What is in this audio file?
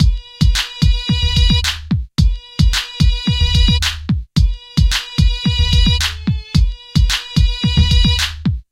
8bit110bpm-60
The 8 Bit Gamer collection is a fun chip tune like collection of comptuer generated sound organized into loops
110, 8, 8bit, bit, bpm, com, loop